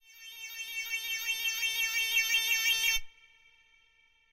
Frequency modulation weird sound.